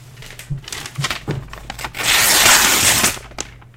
Ripping big paper
Ripping a big piece of paper.
paper, ripping, sheet, tear, tearing